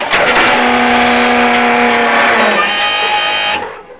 This here is the sound of an airplane's landing gear being lowered/retracted. I actually recorded this for Aces High II in February 2011.